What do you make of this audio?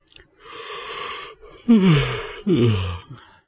A tired human male yawn.